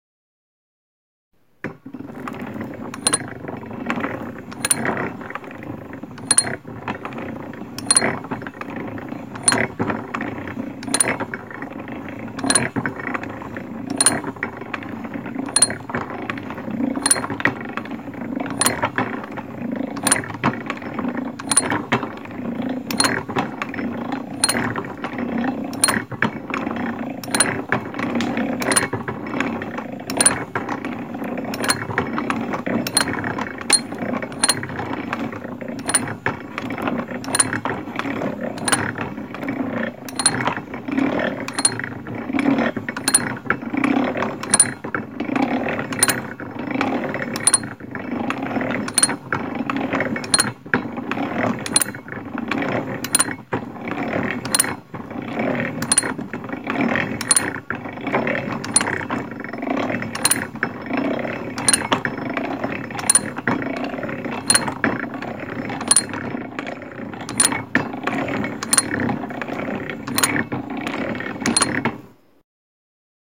Grinding gears and steady clinking of an antique, hand-cranked drill press.